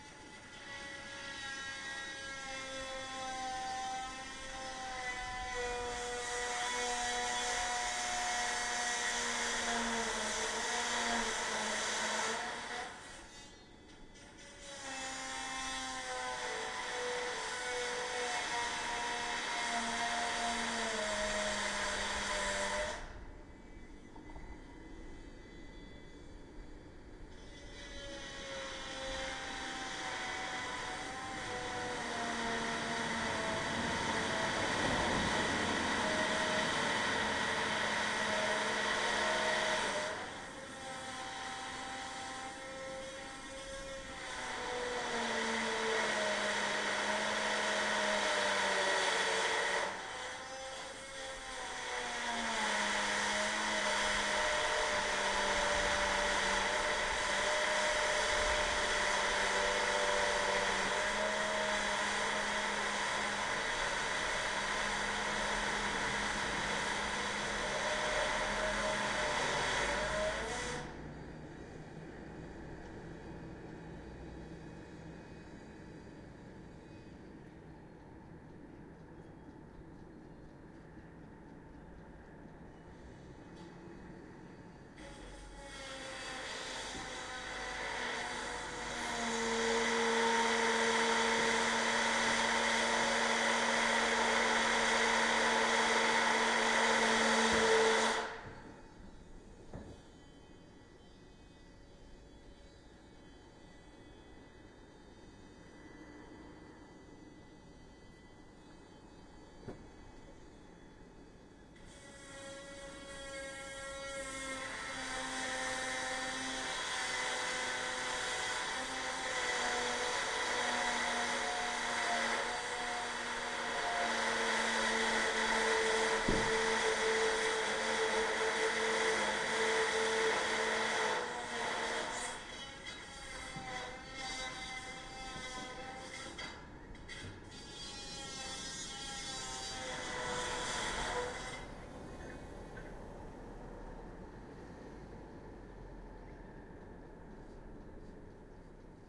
This was captured in our backyard. Cutted from longer recording that I recorded. Don't know where the sound come from. Recorded with Zoom h1n.